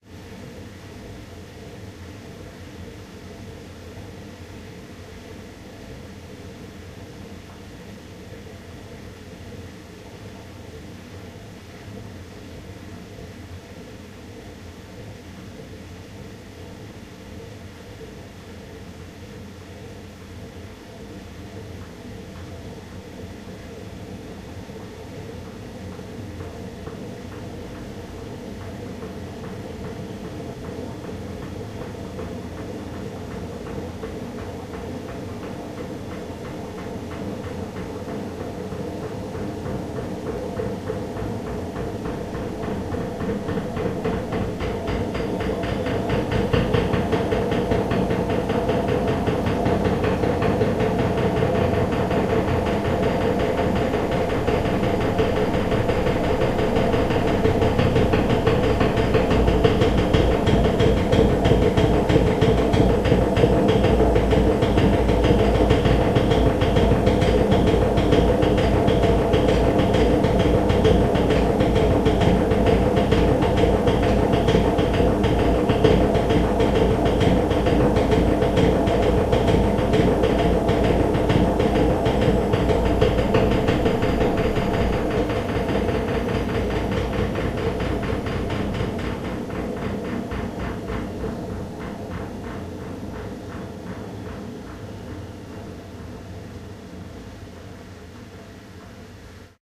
field-recording old washing machine
field-recording, machine, old, work